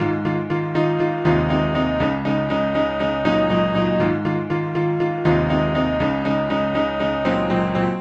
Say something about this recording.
It's a beautiful happy day and I'm feeling nonchalent, this cheerful piano should do the trick :)
happy, piano, sunshine